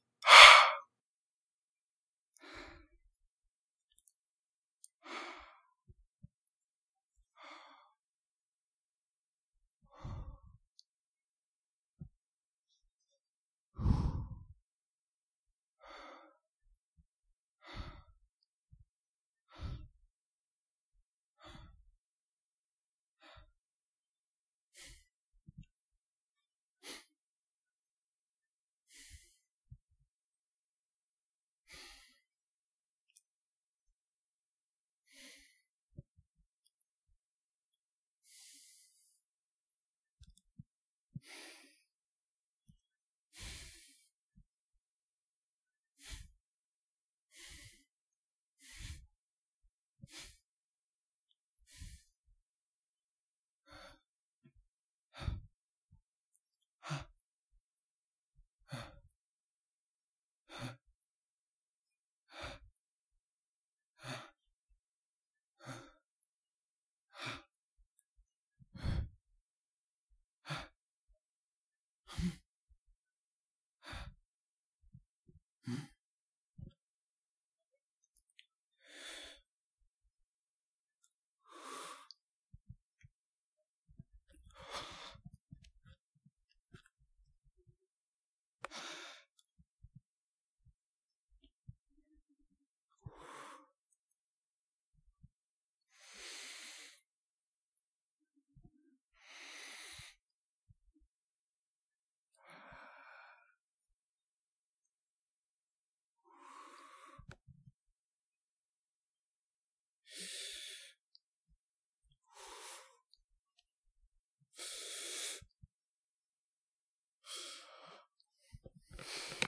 Male voice exhaling